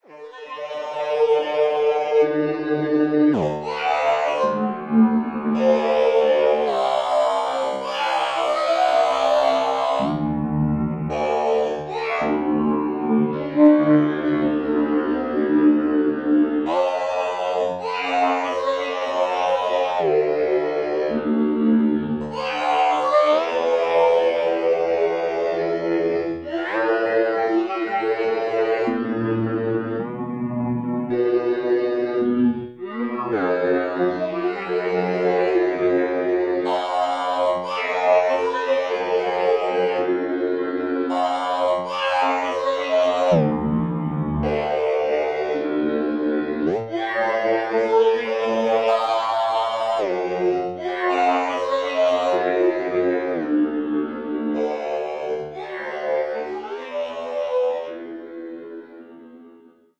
scream variable speed comb
comb, fx, processed, scream, singing, string